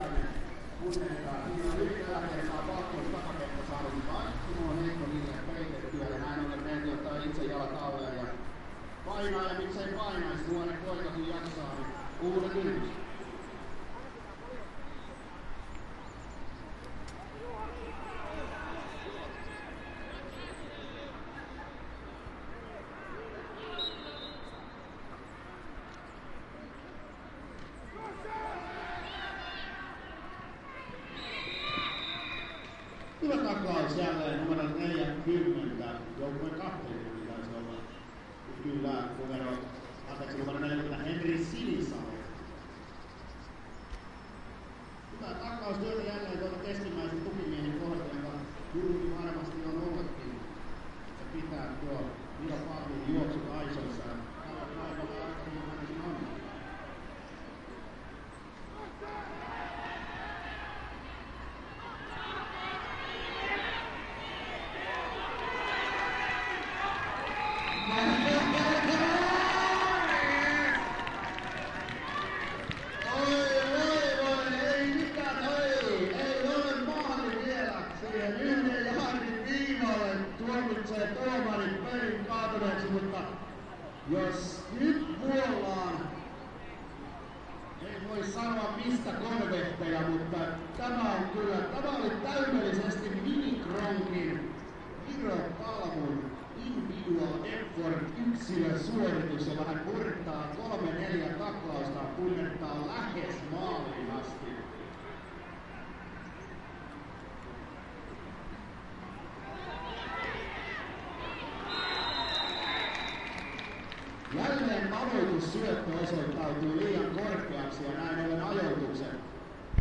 Tampere match foot américain 1
Recorded in Tampere. Sounds taken from the street and in the stadium. American football game. Audience shouting and cheering, commentator talking, referee blowing in the whistle.
game, referee, Tampere, cheers, sport, commentator, Suomi, football, match, players, fans, audience, Finland, whistle